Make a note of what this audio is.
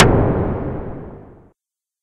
This sound was created using Frequency Modulation techniques in Thor (a synth in the Reason DAW).